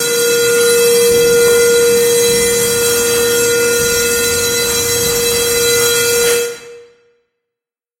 An emergency alarm. Some movement noise (opening a hangar gate, moving some instruments) can be heard.